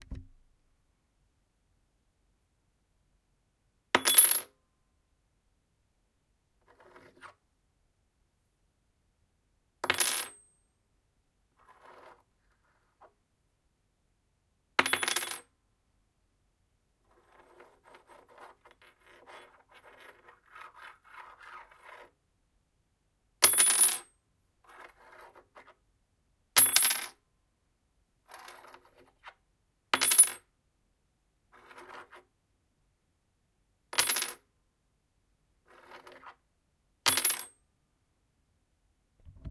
Droping a key on a wooden floor
drop, floor, metal, wood